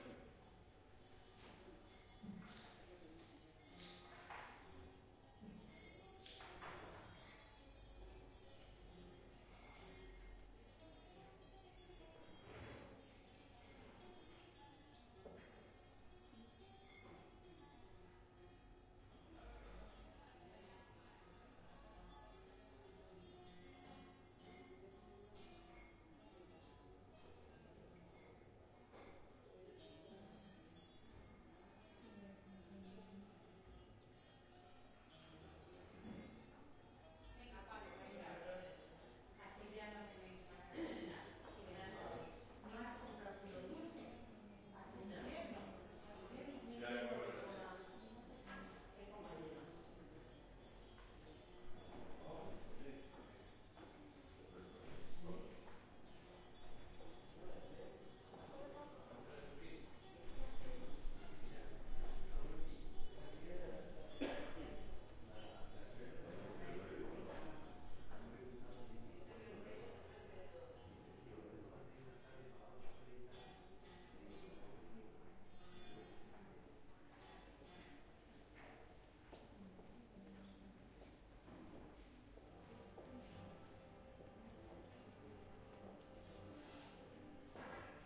Ronda - Hotel Reception - Recepción de hotel (II)
Hotel reception: people talking in the phone, doors, background music, movement, footsteps. Recorded in Ronda (Malaga, Spain) with a Zoom H4N.
Recepción de hotel: gente hablando por teléfono, puertas, música de fondo, movimiento, pisadas. Grabado en Ronda (Málaga, España) con una Zoom H4N.
Andalucia, Andalusia, Espana, Malaga, Ronda, Spain, hotel, indoor, interior, recepcion, reception